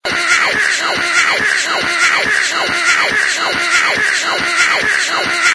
Beaten Alien
A looped sound of an alien being beaten with a laser gun. It might be used in games, music, animations and more. Unfortunately, I recorded only this version with the two layers (the laser gun and the grumbling alien) in the same audio. Don’t have then separated.
Made in a samsung cell phone (S3 mini), using looper app, my voice and body and ambient noises.
space-war, weird, space, ufo, alien, sci-fi, laser, bakground